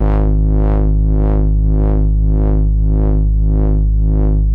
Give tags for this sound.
analog-synth
bass
microcon
technosaurus

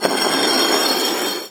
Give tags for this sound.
Brick
Concrete
Dragged

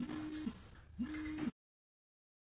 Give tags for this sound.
floor
footsteps
walking

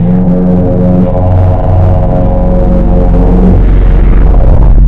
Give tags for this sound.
AAAA
alien
groar
monster
spooky

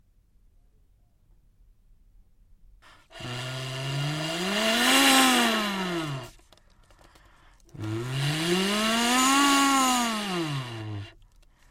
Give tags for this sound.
Toy-Car
Revving
Blow-Ring

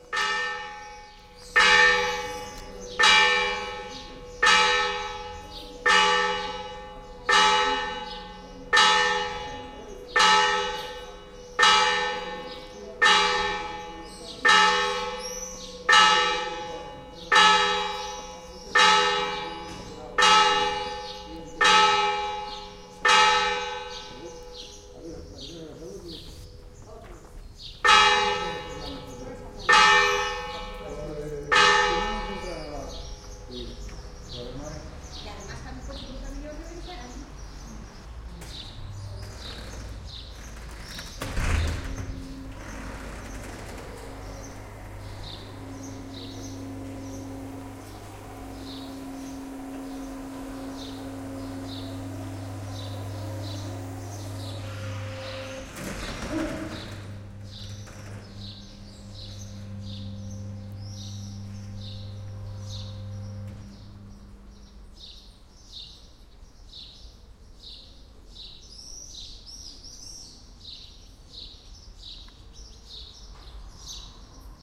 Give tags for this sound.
bell
church
field-recording
Ourense
spain

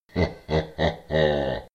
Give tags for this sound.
Counter-Strike
voice
human

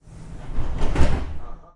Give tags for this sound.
bahn
berlin
close
Closing
Door
german
station
Train

